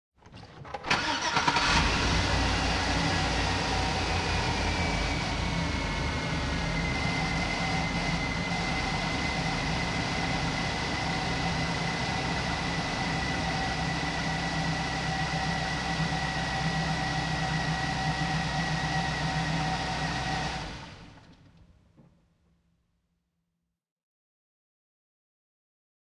2004 Chevy Yukon Start up
2004 chevy Yukon starting up
Recordist Peter Brucker / Recorded 2/3/2019 / sm 58 microphone / recorded outdoors
automobile, car, chevy, drive, driving, engine, motor, start, start-up, travel, truck, vehicle, yukon